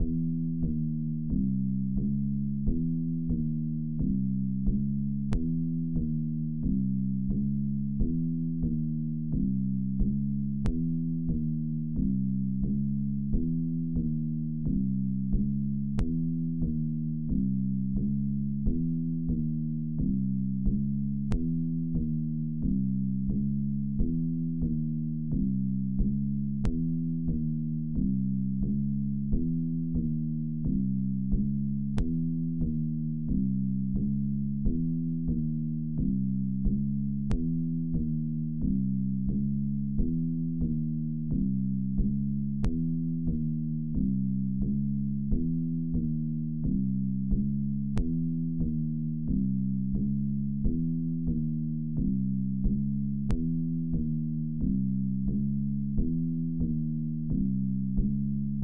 Simple synth bass loop (90 bpm)
This is just a simple bass line i was using for a song i made. Its not much but i figured someone might find use for it.
90, bass, bpm, loop, sample, synth